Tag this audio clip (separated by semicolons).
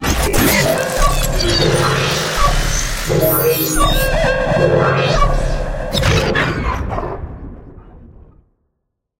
abstract; atmosphere; background; cinematic; dark; destruction; drone; futuristic; game; glitch; hit; horror; impact; metal; metalic; morph; moves; noise; opening; rise; scary; Sci-fi; stinger; transformation; transformer; transition; woosh